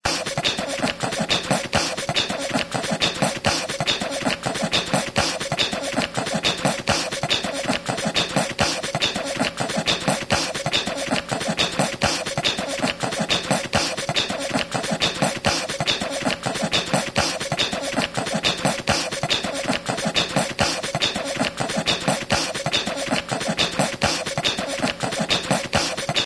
Electrosamba Beatbox
An “exotique” beatbox beat that remember a lot the Brazilian Samba. Made with nose noises and some percussion, it can be used in pure state, as a vignette, or to enrich other compositions. You can call me crazy, but the sound also remembers me a crazy machine producing toys or vintage cars. Try it!
Made in a samsung cell phone (S3 mini), using looper app, my voice and body and ambient noises.
alternative, animation, beat, beatbox, brazil, brazilian, cool, drum, drums, factory, funky, groovy, hip-hop, hiphop, improvised, lo-fi, loop, movie, music, percussion, percussion-loop, rap, rhythm, samba, sfx, song, toon, vignette